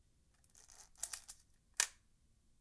The sound of the Film Advancer on the Focal TLR 35mm camera.